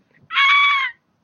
Funny Scream
A failed scream I took from one of my audios. It suppose to be somewhat a fangirl scream but since I'm don't scream really high, the result was this. XD
screen, cute, voice, cartoon, Funny